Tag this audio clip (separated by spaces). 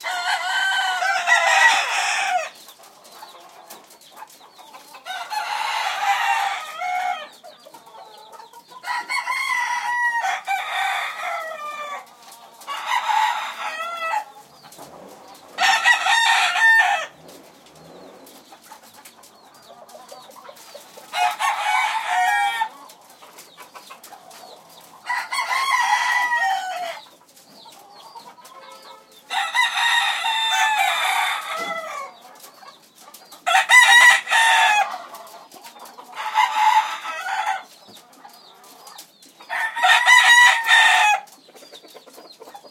environmental-sounds-research chickens coop